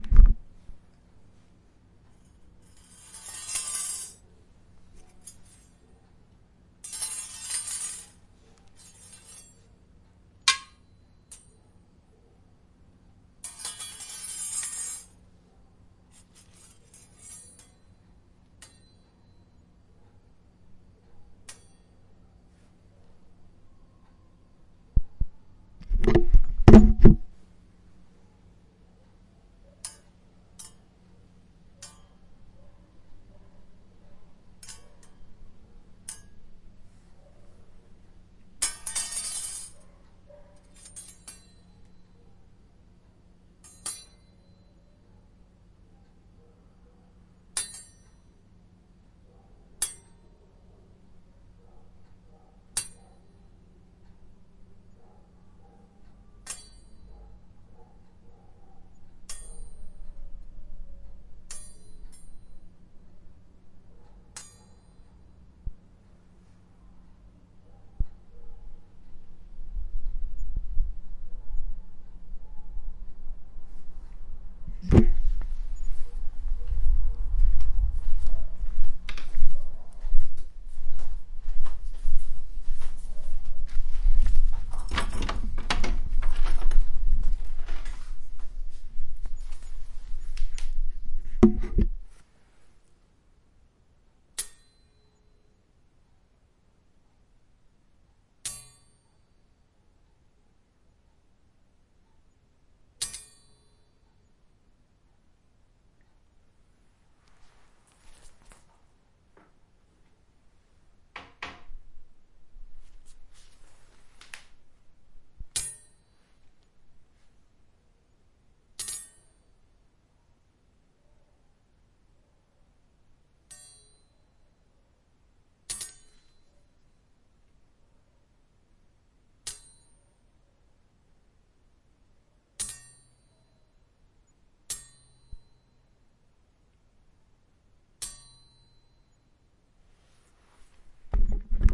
ZOOM0003 cabide
One of my original recordings for Stones Thrown Beat Battle 491.
A metal coat hanger being hit on the stone pavement or a wall, or just dropped. Several strenghts and hit methods.
Recorded at my inlaws with a Zoom H1 internal mics fitted with windshield, 03-Aug-2016.